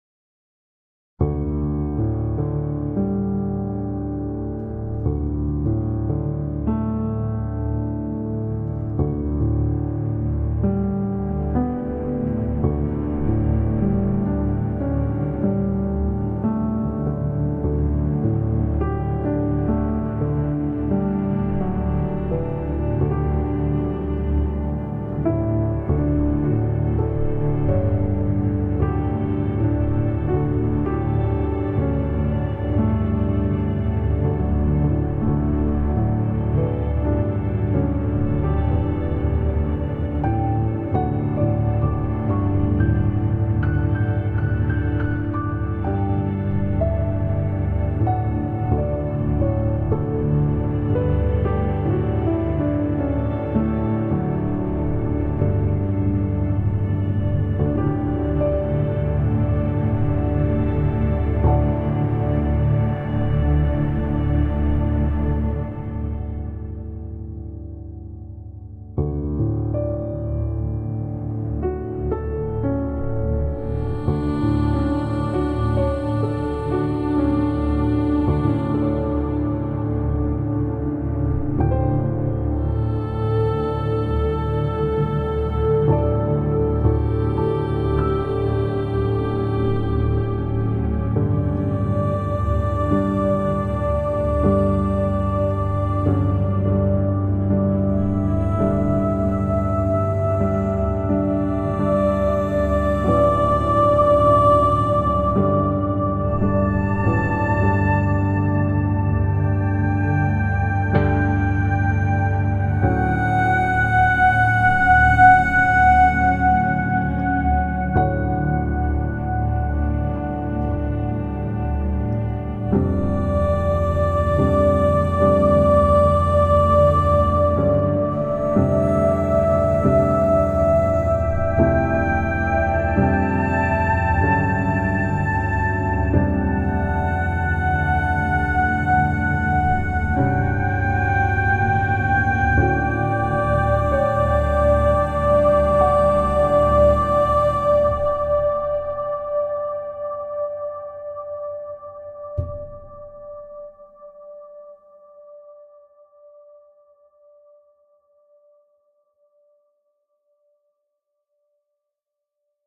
BCO - Äitienpäivä '22
Melodic piano, textured strings, and ethereal vocals.
ambience, ambient, atmosphere, calm, cinematic, drifting, ethereal, harmonic, melodic, new-age, piano, relaxing